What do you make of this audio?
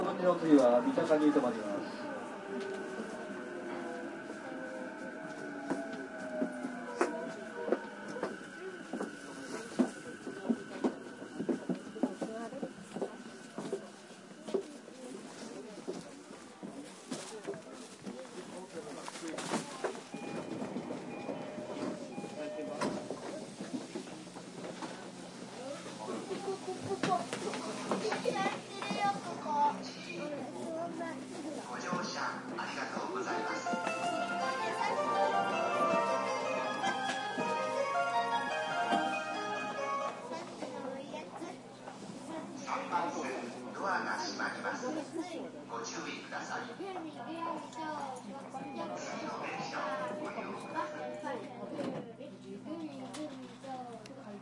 train door closing tokyo
The sound from the inside of a train in Tokyo as the doors close on a busy afternoon.